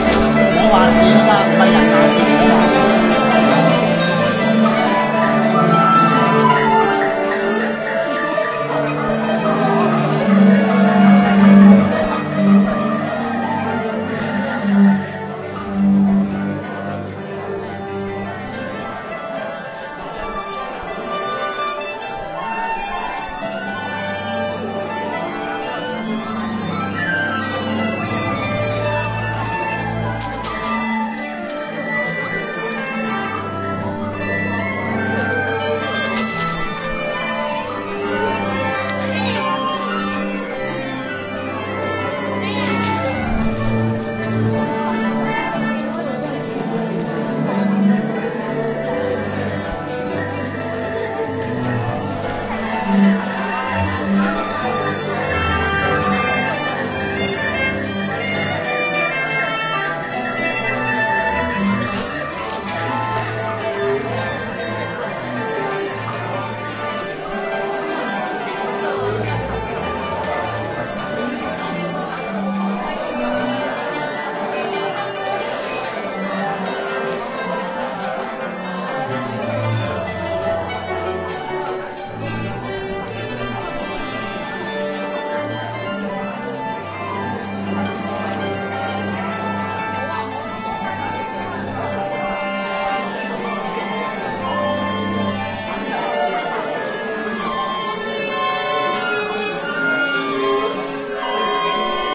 minutes before starting concert by Macau Chinesse Orchestra